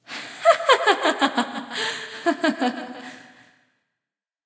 Female Evil Laugh 2
Female laughs with delay and reverb
Recordists Peter Brucker / recorded 4/17/2018 / condenser microphone / edited in Logic Pro X / performer E. Jones
ghosts, laughing, sinister, voice